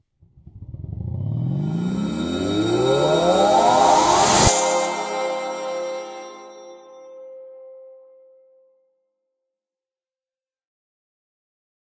Trailer hit 7
Cinema FX
What you hear is an alto saxophone with some other sounds processed in Logic.
boom, cinema, design, effect, effects, film, fx, garage, hits, sound, woosh